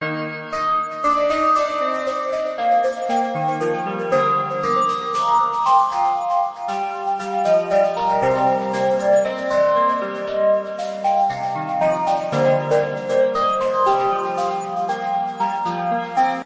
Airy Bells, Ringtone/Riff
A gentle, sweet riff with pulsing air and delay, good for a ringtone or use in a podcast.
Chords- D#, A#, Cm, Gm. 117 bpm.